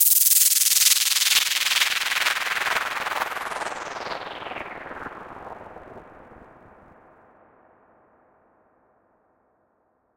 Lunar Downlifter FX 2
downlifter, fx, lunar